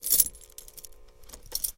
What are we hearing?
sons cotxe claus 2 2011-10-19
car, field-recording, sound